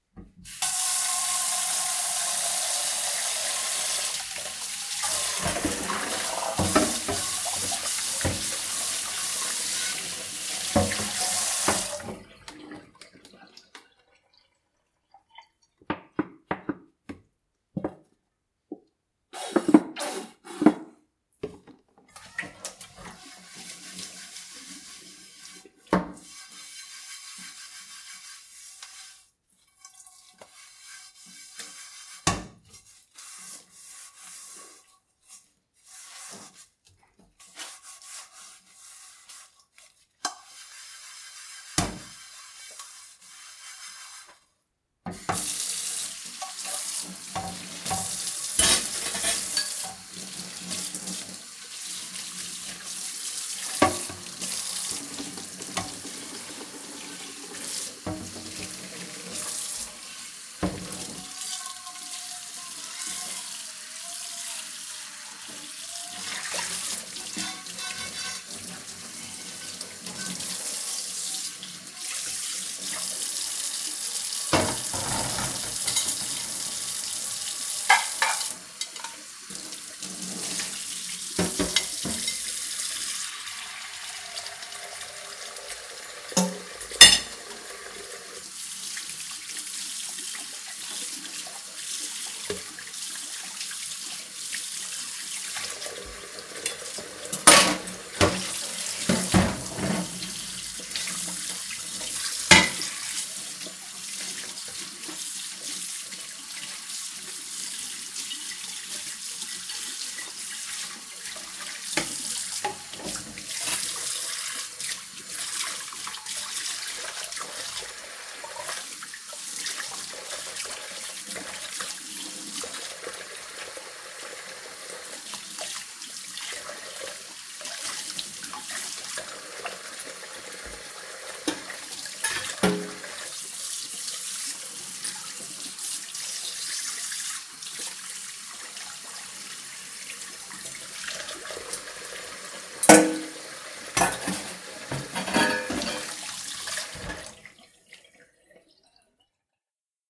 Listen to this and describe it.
Washing dishes.
Thank you!